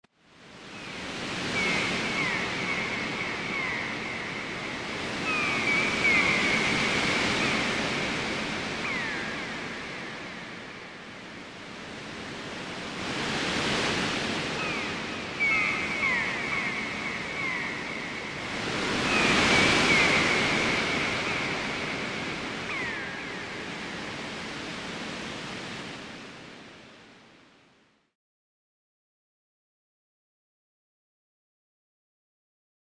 ARTIFICIAL NATURE
An artificially generated ambiance of earths beaches With seagull sounds. This is perfect if your looking for wave and beach sounds that are not necessarily "Real". Can be used to accentuate your environment in any project you desire! Comment for more sounds like these :)